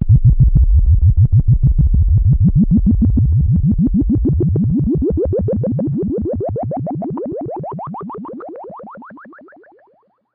ARP Odyssey percolating sound, starts at low frequency and climbs into the upper registers.